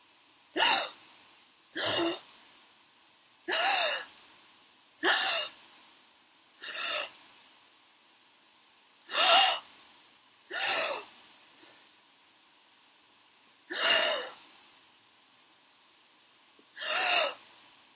female sharp inhale sounds
Some female inhales recorded for the moment of drowning and getting out of water
breath, breathing, drowning, gasp, horror, inhale